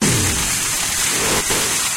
interface saw 01 122bpm
Alvarez electric guitar through DOD Death Metal Pedal mixed into robotic grind in Fruity Loops and produced in Audition. Originally recorded for an industrial track but was scrapped. (no pun intended0